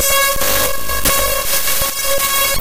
An intensive screaming buzzer. Enough said about it.
glitch, processed, noise, data, harsh